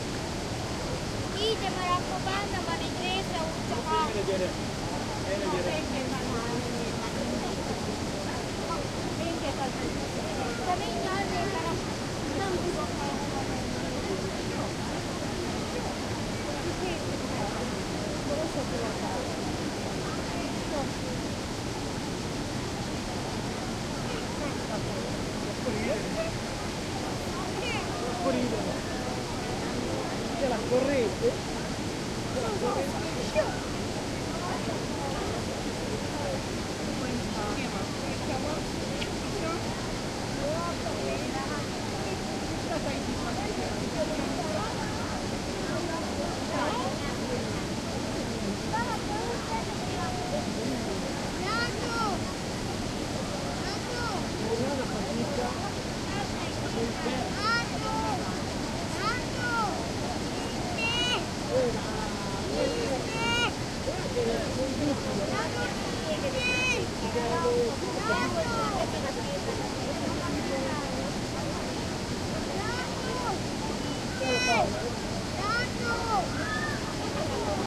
130717 Krka SwimmingHole R 4824
Surround recording of the waterfalls in Krka/Croatia. Close-range recording of a swimming hole at the bottom of the falls, teeming with bathers from all sorts of (mostly slavic) countries. It is high summer, crickets are chirping audibly. A small brook flowing to the falls from right to left can be heard directly in front.
Recorded with a Zoom H2.
This file contains the rear channels, recorded with a dispersion of 120°
close, water